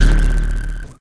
STAB 025 mastered 16 bit
An industrial percussive sound burst. Created with Metaphysical Function from Native
Instruments. Further edited using Cubase SX and mastered using Wavelab.
industrial, percussion, electronic